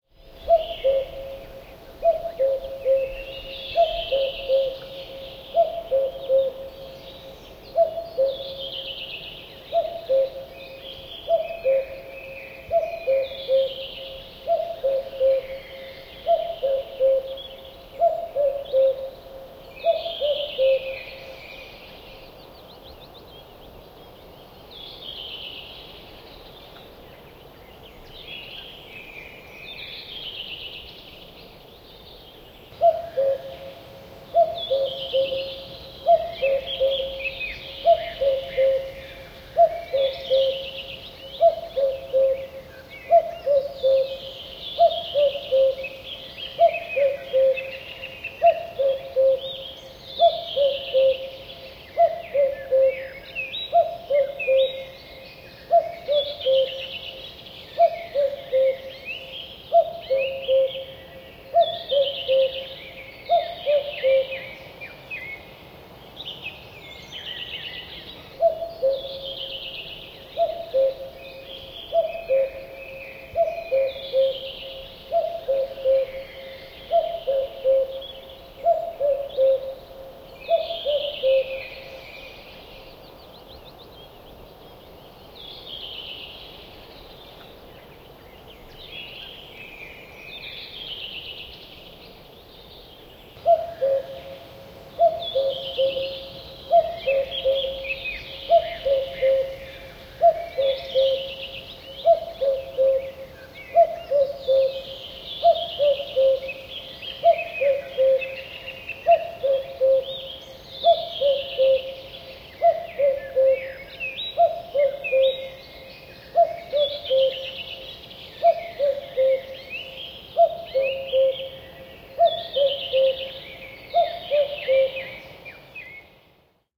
Käki kukkuu, erikoinen / Cuckoo, distant, unusual cuckooing in the forest, sometimes hoarse, mostly three-part cuckooing, small birds in the bg (Cuculus canorus)
Käki kukkuu kauempana epätyypillisesti. Kolmitavuinen, välillä käheä kukunta, myös tavallista kukuntaa, kesä. Taustalla muita lintuja. (Cuculus canorus)
Paikka/Place: Suomi / Finland / Parikkala, Uukuniemi
Aika/Date: 09.06.1998
Linnut, Soundfx, Luonto, Birds, Cuckooing, Cuckoo, Nature, Animals, Finnish-Broadcasting-Company, Tehosteet, Summer, Forest, Yle, Lintu, Finland, Kukkuminen, Field-Recording, Kukkua, Yleisradio, Suomi